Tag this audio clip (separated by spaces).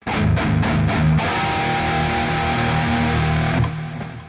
metal rock eletric-guitar riff